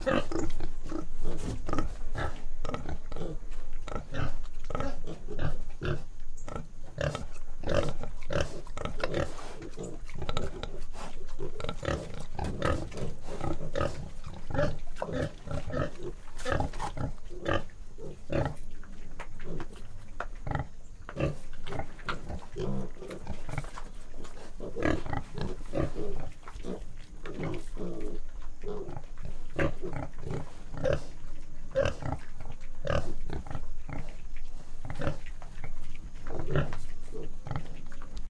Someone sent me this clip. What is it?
big pigs
pigs recorded on octava
brazil, countryside